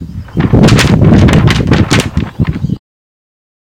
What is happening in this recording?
hoja de papel
paper wind strong